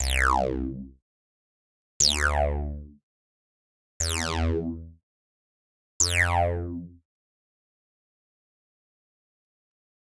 Nothing,but an acid like sound designed randomly using Modular synth,on Caustic 3.
caustic, modular-synth, phaser